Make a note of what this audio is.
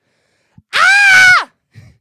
woman Susanne screams AA
Susanne screams AA
scream, woman